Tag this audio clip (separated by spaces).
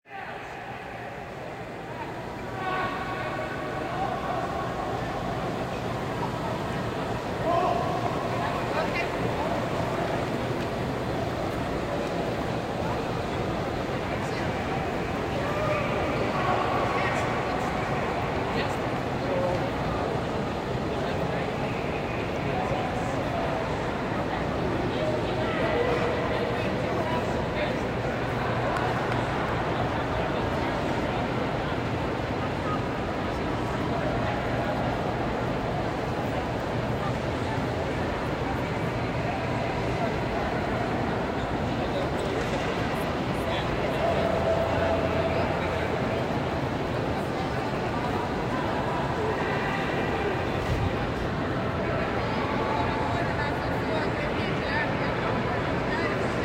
Central Trains ambience Train Public City York Main